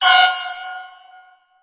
Jump scare sound 3
Sound made for jumpscare scenes in games or movies. Made with Musescore
jumpscare, horror, scare